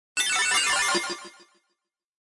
Retro Game Sounds SFX 80
retro, shoot, pickup, game